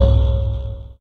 STAB 041 mastered 16 bit
An electronic effect sound, could be used as synthetic drum sound. Created with Metaphysical Function from Native
Instruments. Further edited using Cubase SX and mastered using Wavelab.
electronic, effect, percussion